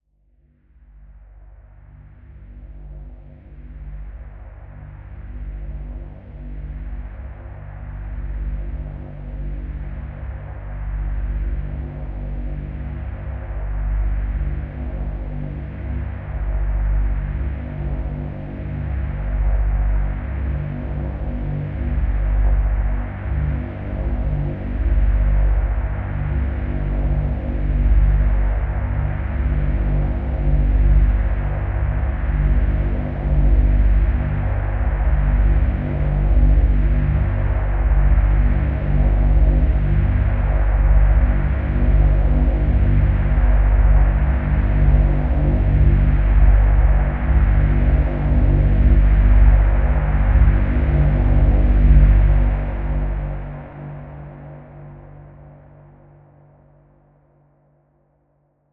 Cinematic Dramatic Buildup
Album: Cinematic sounds
build-up, film, haunted, horror, movie, phantom, scary, suspense, thriller